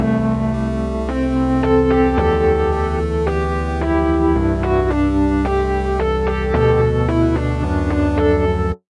ElektroVII-EXP
Free virtual synth plug-in TAL-ElektroVII. Own melody.
electro, electronic, electronica, experimental, loop, melody, synth